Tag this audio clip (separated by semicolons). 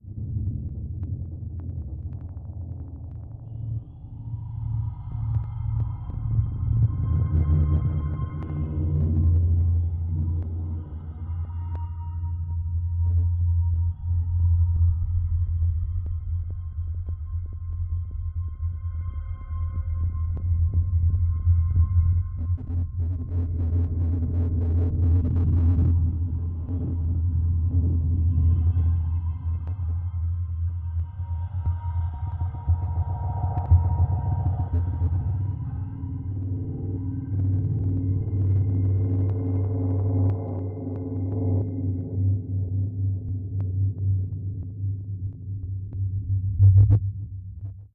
ambience; strange; ambient